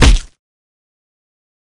Bloody Punch
ouch, puch, slap